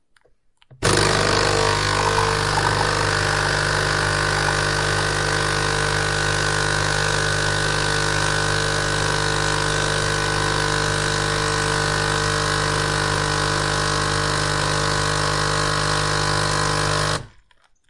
Brewing from a coffee machine